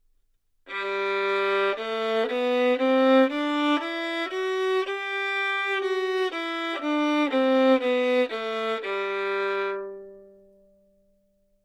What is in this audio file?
Violin - G major
Part of the Good-sounds dataset of monophonic instrumental sounds.
instrument::violin
note::G
good-sounds-id::6294
mode::major
scale, violin